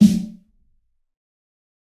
fat snare of god 017
This is a realistic snare I've made mixing various sounds. This time it sounds fatter